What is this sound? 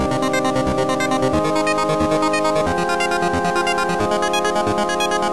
Computer music (electronic). 7bit stupid melody and leads
hardtek, 180bpm, music-loop, techno, loop, lead-loop, electro